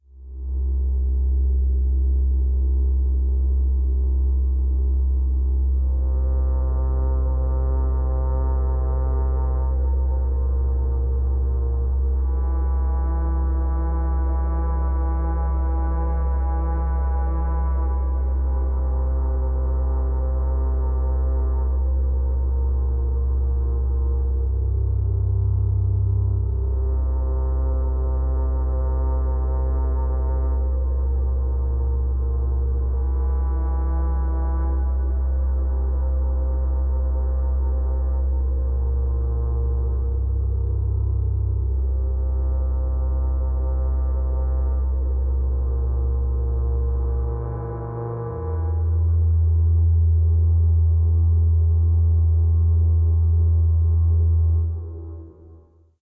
Made using Synplant in Cubase, with MIDI controller.
Atmospheric, Drone, Soundscape